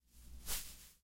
planta, grama, hojas
hojas, planta, grama